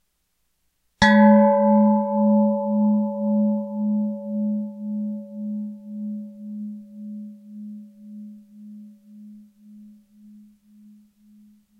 mixing bowl ring
Large metal mixing bowl, held from the center, upside-down; the rim was struck with a wooden handle.
household, kitchen, metal, percussion, ringing